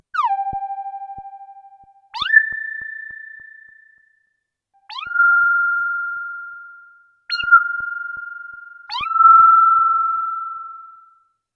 synthesized "micious" lead, imperfect recording .. some popsorry